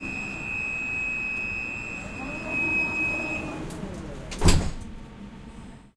INT-zaviranidveri
Noise of trams in the city.